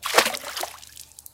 I can't remember what I used to make this splash sound but I probably just splashed my hand into the water of a pool. Recorded using a Sony IC Recorder and processed in FL Studio's Edison to remove sound.
shallow, small, sony-ic-recorder, Splash, water